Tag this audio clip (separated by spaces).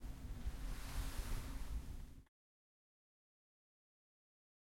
elevator Mute ambient